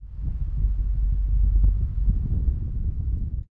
Thunder and wind on stormy night
THunder and wind on a stormy night.
wind, thunder, storm